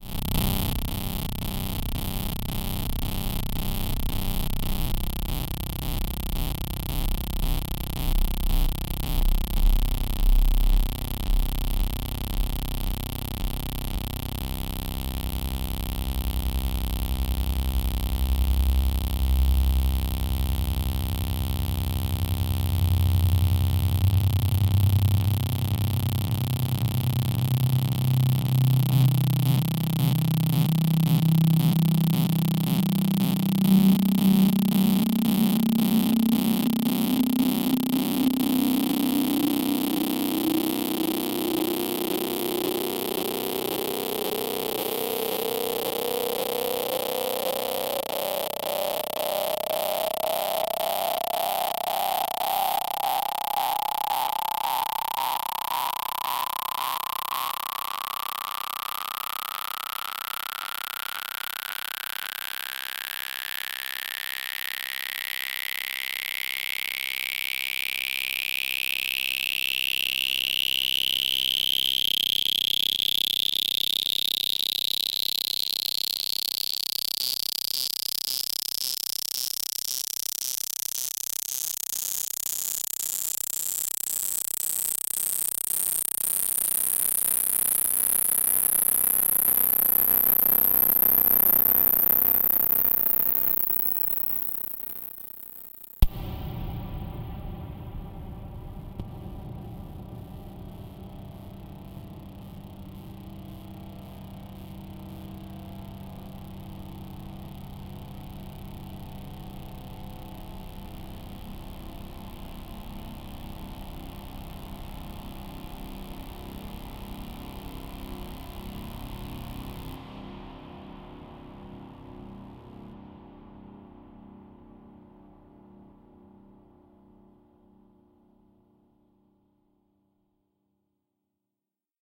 VIRAL FX 01 - C1 - SAW FILTER SWEEP plus REVERB BURST
Created with RGC Z3TA+ VSTi within Cubase 5. Noisy effect with very slow filter sweep followed by a heavily reverb noise burst. The name of the key played on the keyboard is going from C1 till C6 and is in the name of the file.
sci-fi, noise, effect, fx